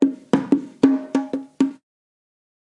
JV bongo loops for ya 1!
Recorded with various dynamic mic (mostly 421 and sm58 with no head basket)
congatronics, loops, tribal, Unorthodox